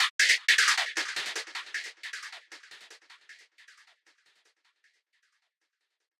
synth sound processed using camel phat and delay
delay, fx, processed